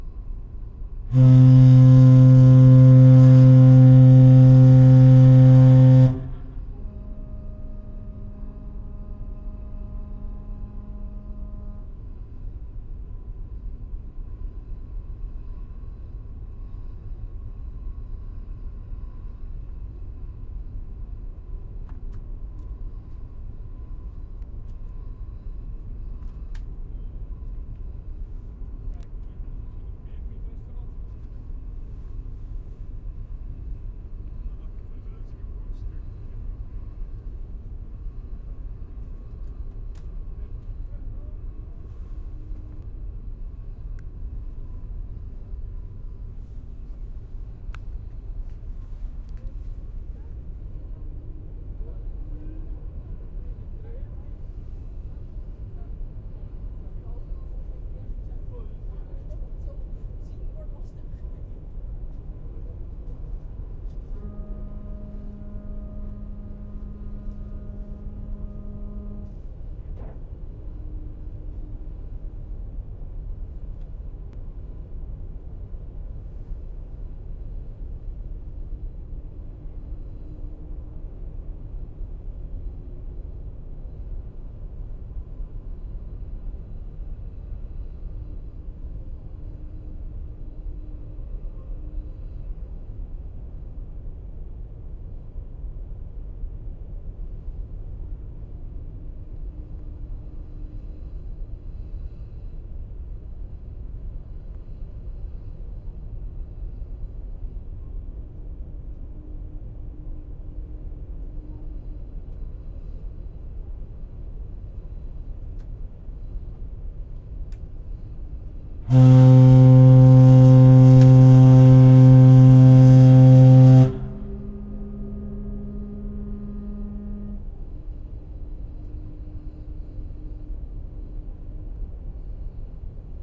ships horn
You couldn´t see much, except the ship itself and the fog.
On this recording you could hear the ship and its foghorn.
Sony PCM-M10 recorder.